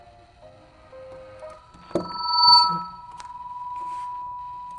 high pitch electronic noise